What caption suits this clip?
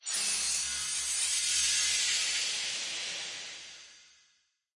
Magic Spell Fairy Dust
Designed magic spell sound using a bell tree as a source.
Designed, Fairy, Magic, Spell